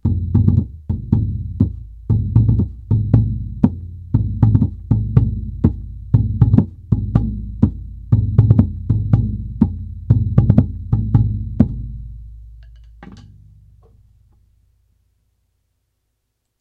short rhytm and drum bits. Good to have in your toolbox.
music, lumps, bits, fragments, toolbox